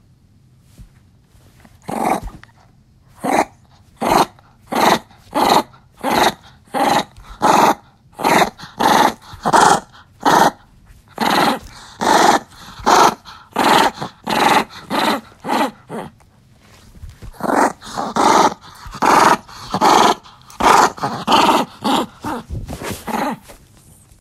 Small Dog Growling
This is the sound of a 10 year-old small, male Poodle/Chihuahua mix playfully growling.
animal, playing, pet